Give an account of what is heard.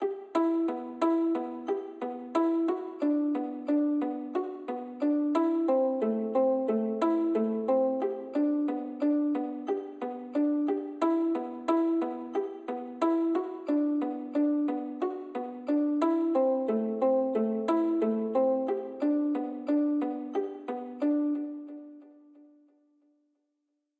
Guitar Passage (90 BPM E Minor) with reverb and delay tail
This guitar part was created using a free sound generator and third party effects and processors. This passage would ideally suit breakdowns or build ups in EDM style music.